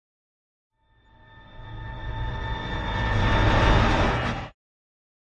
Bright Metal Rise Sound Effect. Created using granular synthesis in Cubase 7. I kept it dry so people can add their own reverb effects if they desire to do so.